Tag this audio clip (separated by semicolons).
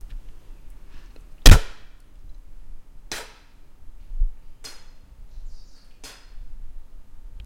mouth; spit; OWI